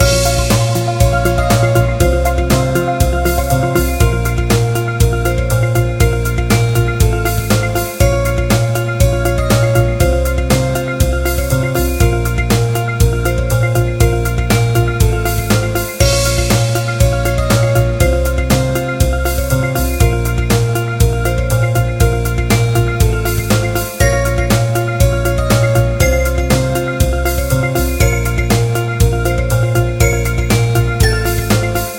Loop EndlessCorridor 06
A music loop to be used in storydriven and reflective games with puzzle and philosophical elements.
indiegamedev, Philosophical, games, music, video-game